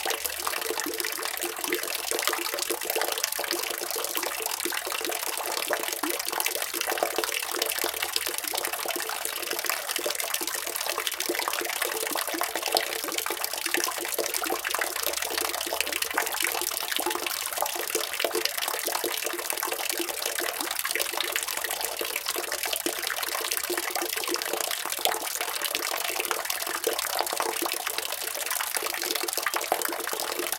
A water well, recorded with a Korg MR-2 with it's built in Microfon.
well, wet, water